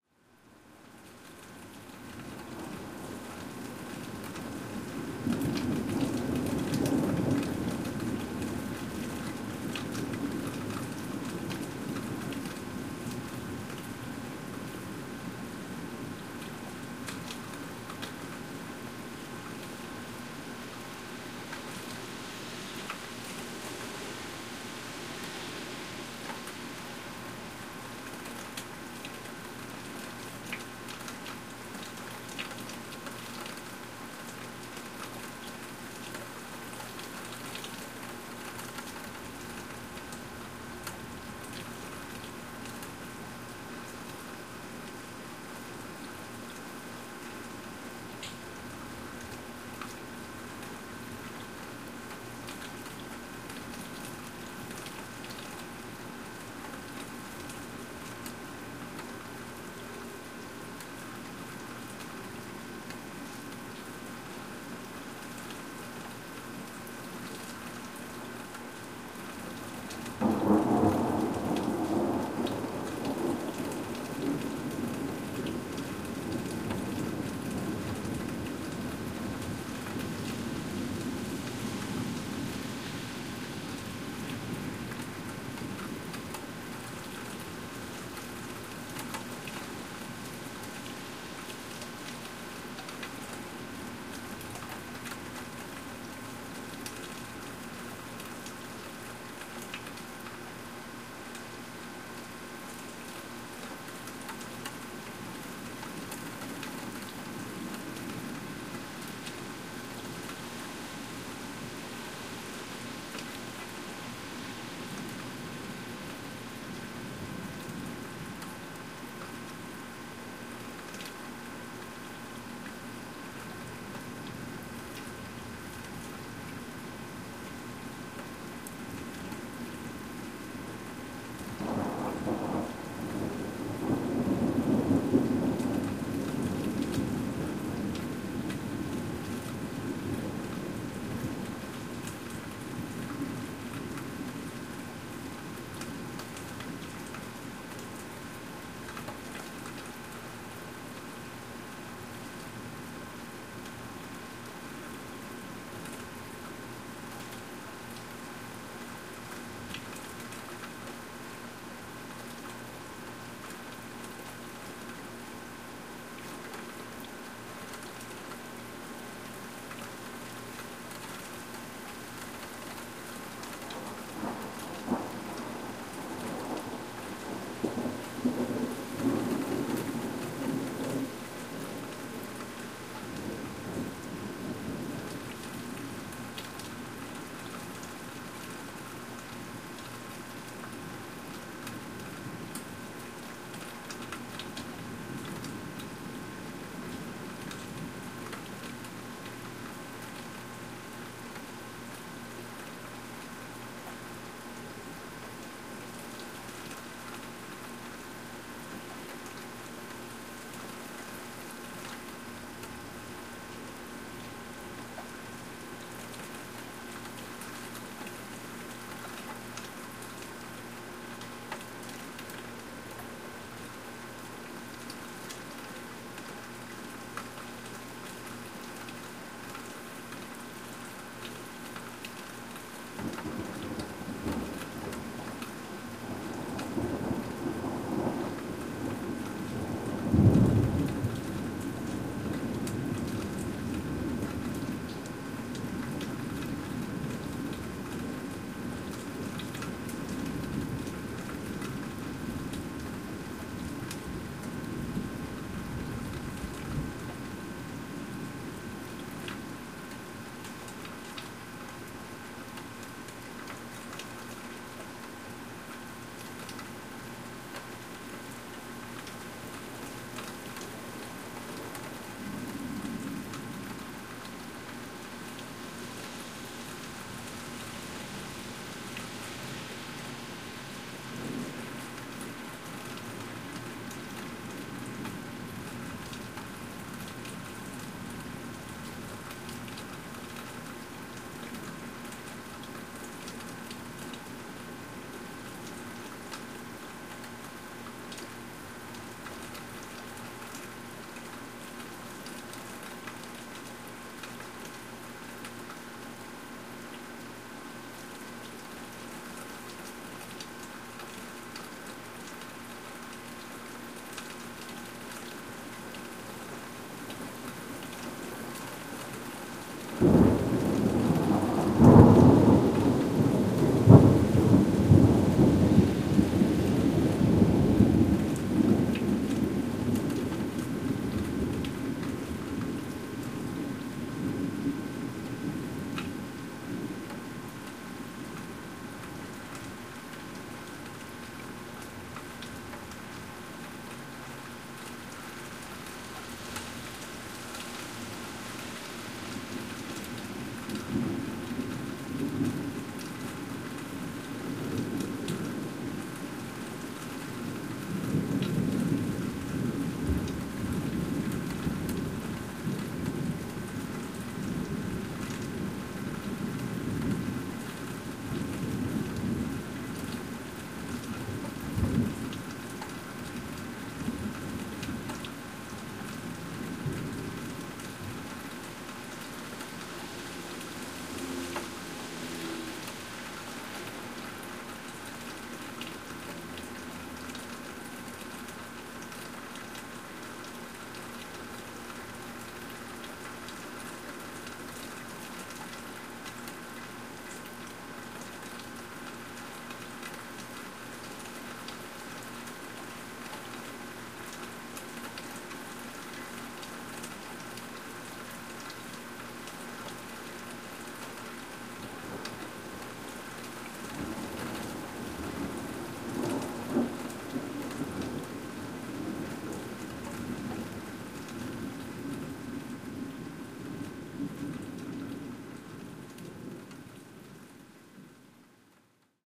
rain and thunder from outdoor break area
Rain and thunder recorded at an outdoor break area outside a workplace
thunder, patio, ambience, work, outdoor, field-recording, weather, rain, nature